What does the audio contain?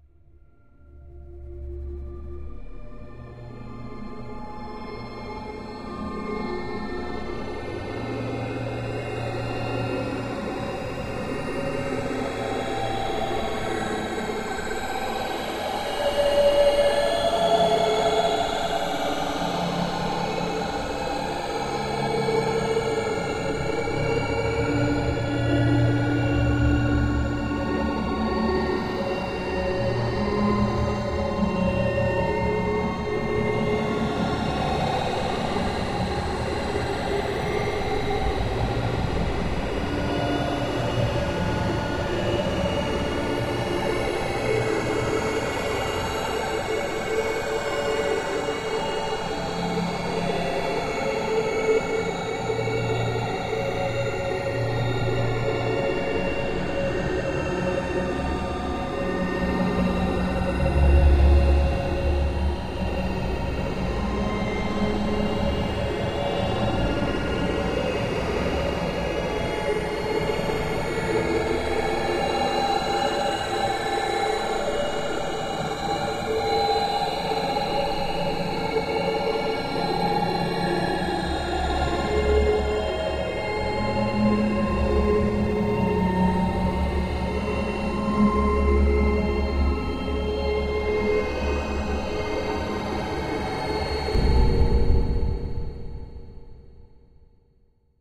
This one is a violin tuning sound. I slowed it down and modified it in Wavepad.
horror, slow, violin